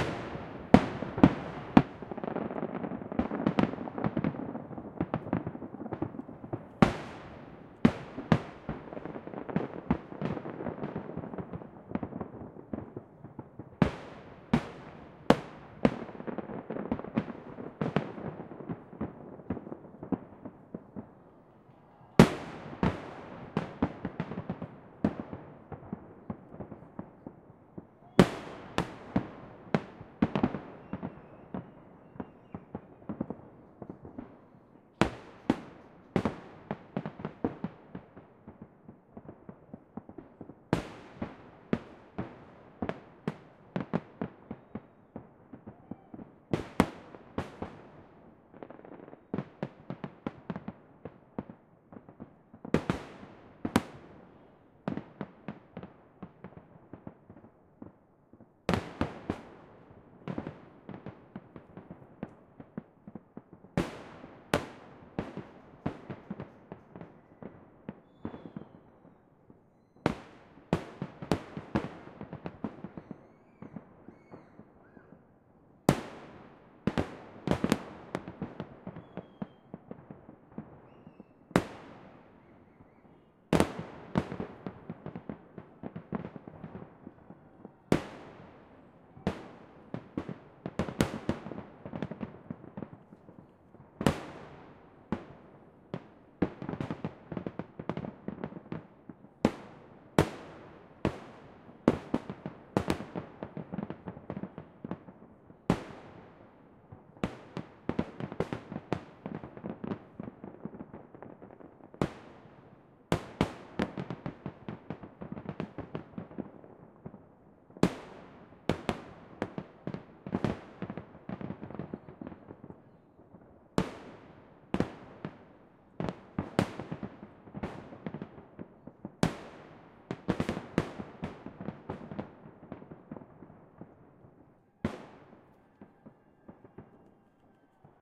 Salute fireworks on 9-th may
9-th, firework, fireworks, may, salut, salute